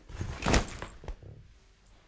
bag down 8
Can be used as a body hit possibly.
body-hit, impact, bag, rucksack